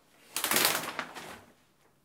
A shower curtain being pulled shut